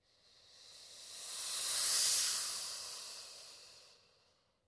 Reversed crash on a drum set created by mouth.